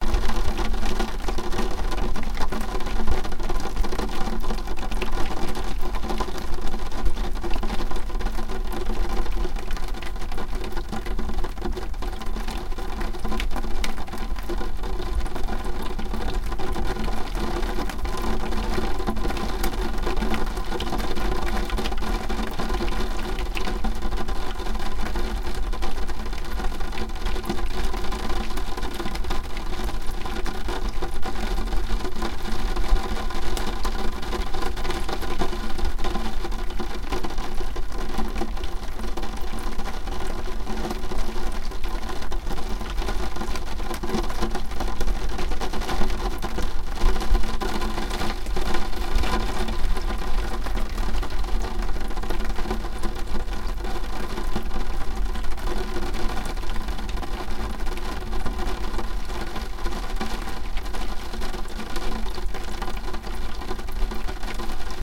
rain against window 2
Intense rain drops against small window pane recorded with a Zoom H1 XY-microphone.
But you don't have to.
Wanna see my works?